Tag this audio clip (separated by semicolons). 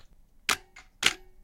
Camera; D800; Kamera; Lens; Mirror; Nikon; Shutter; Sound; Speed